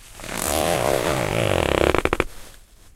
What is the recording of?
a stout zipper being fastened. Shure WL183, Fel preampm, Edirol R09 recorder